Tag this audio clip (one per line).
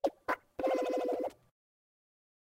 Machinery
Mechanical
Ambient
Machine
running